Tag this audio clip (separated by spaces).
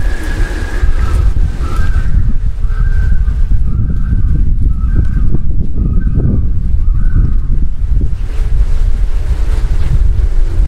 bird-calls loons